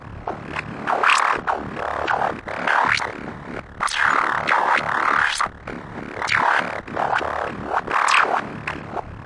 Robot Breath
Robots can talk. But ever hear them breathe hard after a terrifying roller coaster ride? Sound courtesy of Subtractor synth.
effect, synth